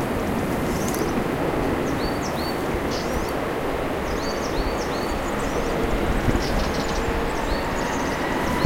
Gafarró, Mallarenga petita i Tallarol capnegre
Three species of birds singing in the pines in Prat of Llobregat. Recorded with a Zoom H1 recorder.
el-prat, spring, nature, Deltasona, birdsong, forest, birds